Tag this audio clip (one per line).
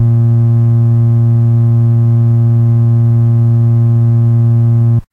bass
organ
acetone
pedal
ace
sub
tone
basspedal
subbass